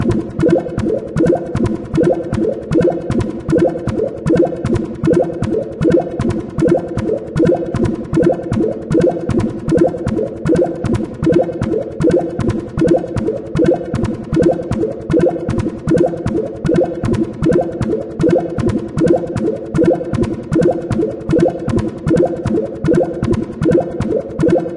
Tekno sound
a very funny repetitive synthloop.